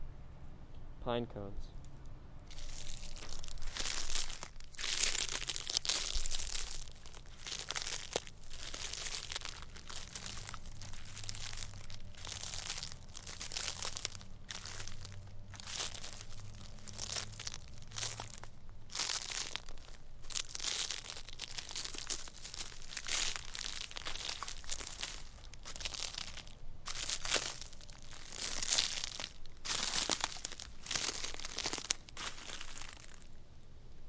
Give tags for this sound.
field-recording; mono; nature

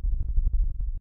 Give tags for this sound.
loop; image

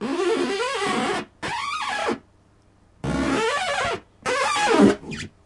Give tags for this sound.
balloon; baloon

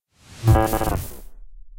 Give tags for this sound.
transition; effect; electric; swoosh

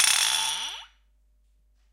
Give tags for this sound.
glass; knock; strange; weird

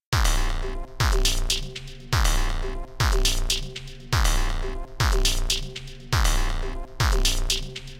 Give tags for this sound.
percussion; loop; electronic

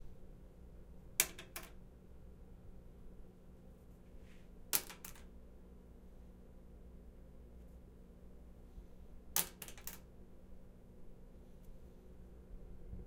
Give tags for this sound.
comb; drop; field-recording; H6; hotel

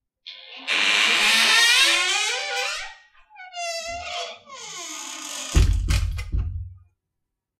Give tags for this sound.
door; wood; close; creak

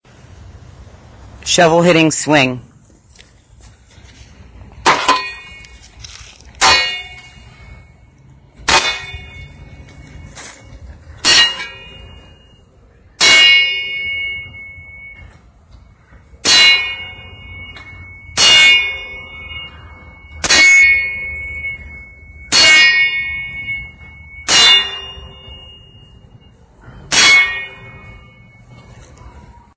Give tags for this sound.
bang; banging; clang; clanging; hit; impact; iron; metal; metallic; ring; rod; shield; steel; strike; ting

